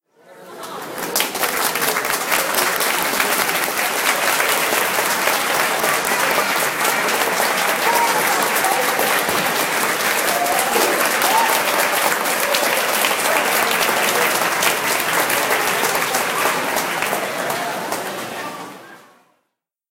A crowd applauding after a school orchestra performs a piece at a mall. (Pearlridge Center) Recorded with my iPhone using Voice Memos.